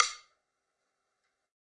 Sticks of God 015
drum, drumkit, real, stick